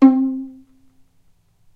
violin pizzicato vibrato